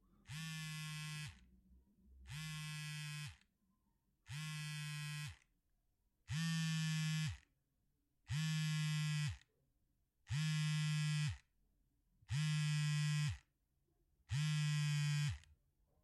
A Cellphone vibrating while handheld.
Recorded with Røde NTG3 + Marantz PMD 661 MKII